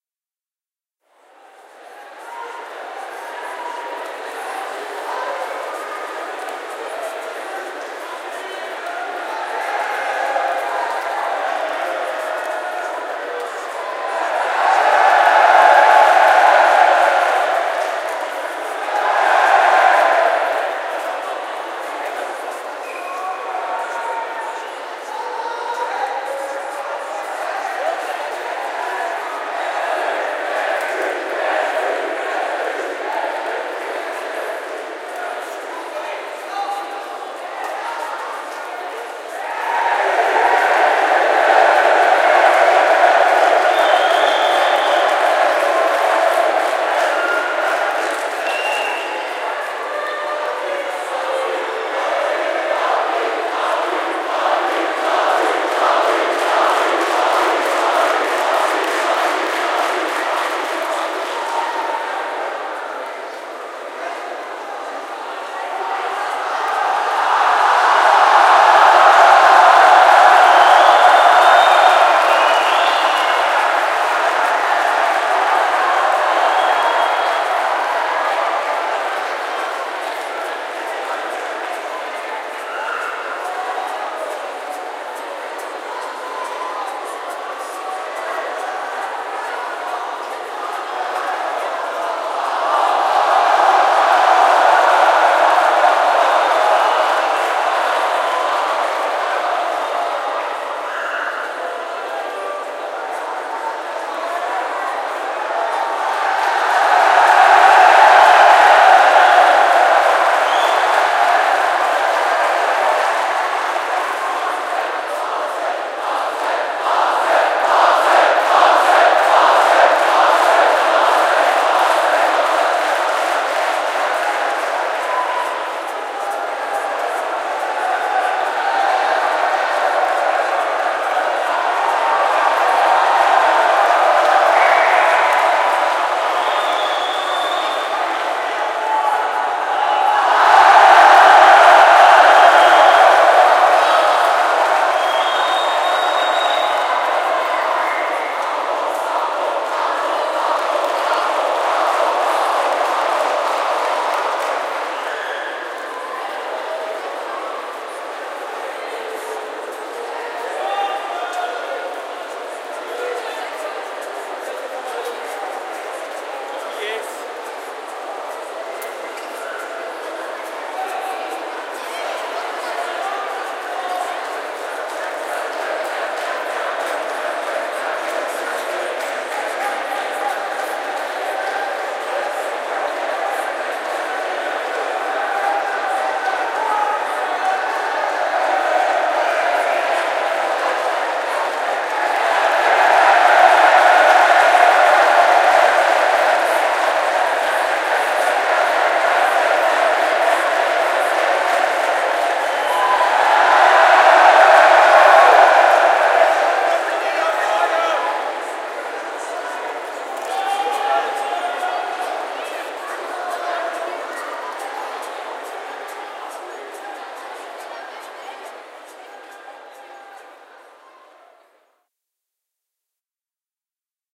Crowd Cheering - Full Recording
A sound of a cheering crowd, recorded with a Zoom H5.
entertainment, stadium, crowd, sports, people, loud, event, games, big, cheer, audience, hall, concert, cheering